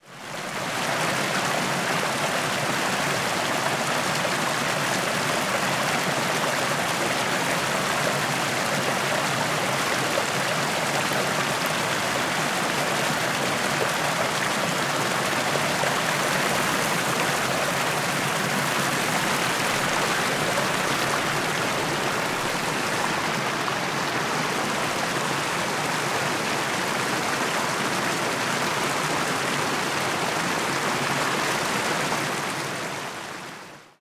Water Stream-Flowing 03
A selection of nature sounds.